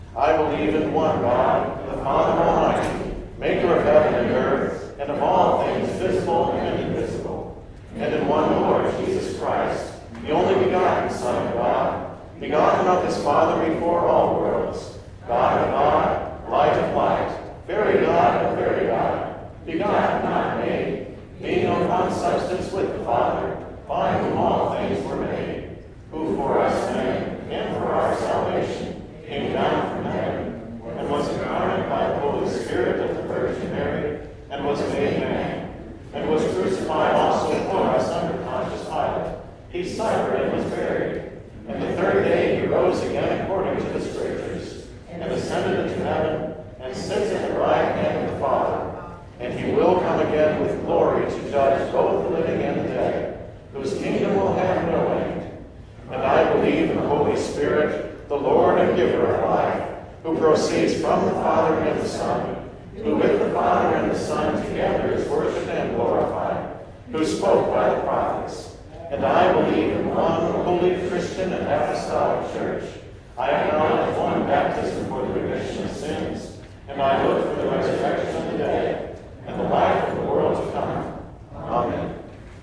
Ben Shewmaker - Nicene Creed
Small church congregation chanting the Nicene Creed.
people, religion, chant